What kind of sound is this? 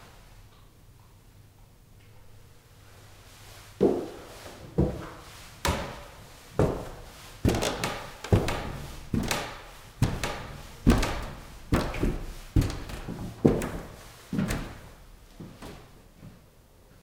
Sound of man doing up old staircase slowly.